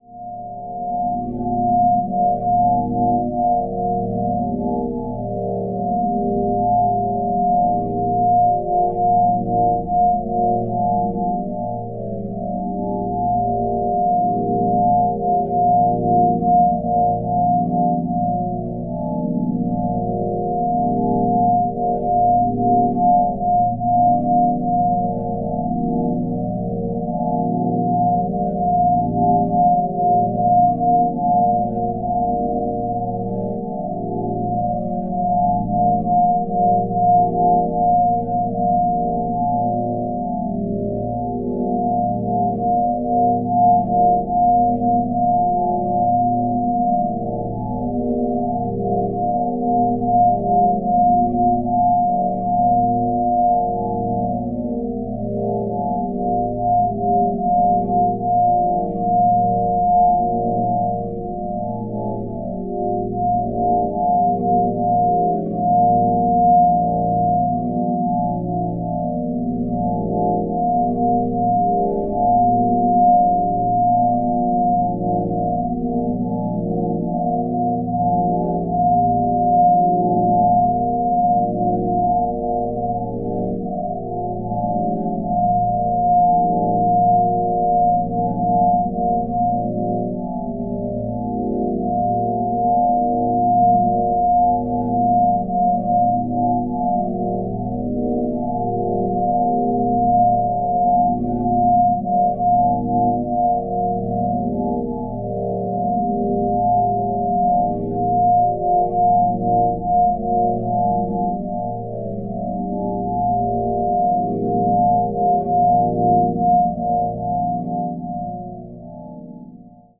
This sample is part of the "SineDrones" sample pack. 2 minutes of pure ambient sine wave. Dense weird horror and dark atmosphere. Higher frequencies. Dissonant.